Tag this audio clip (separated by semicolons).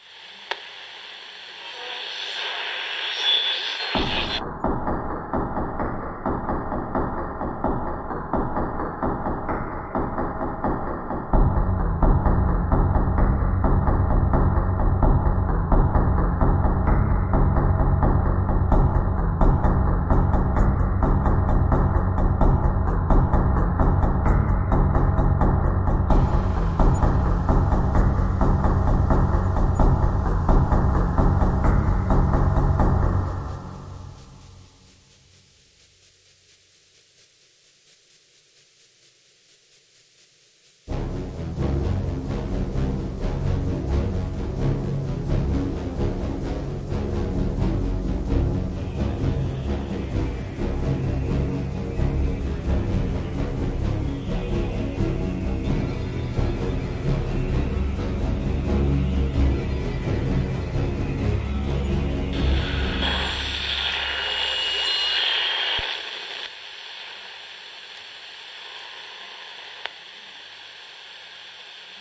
sounds; SUN; star; wave; future; space; radio